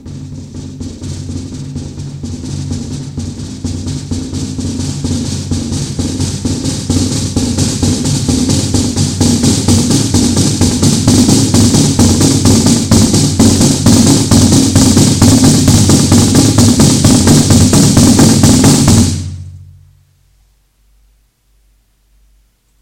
drum fragments music composition toolbox
toolbox, fragments, drum, composition, music